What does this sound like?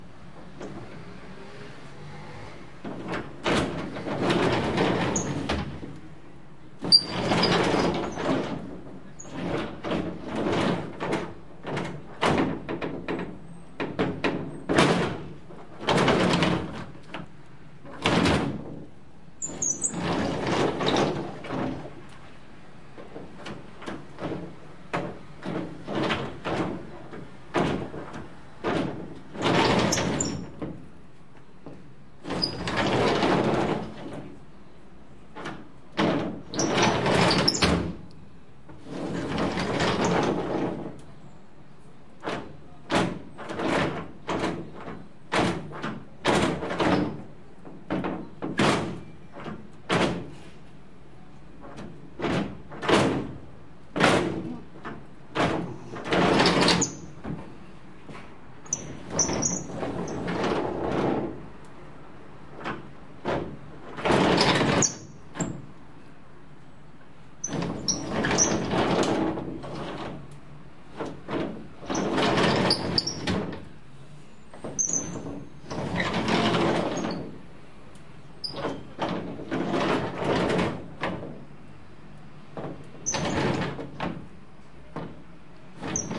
glass-door-slide05
Sounds of a glass sliding door being opened and closed... And getting stuck more often than not :P. Recorded with a Zoom H4n portable recorder.
close, closing, door, glass, glass-door, open, opening, slide, sliding-door, stuck